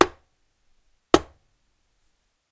In my endeavour to upload my sound design projects to share it with this amazing community I recorded two slams of a wooden gavel on a wooden table.
(The gear I used for these are an SSL XLogic Alpha VHD PRE and two Neumann KM184 Microphones.)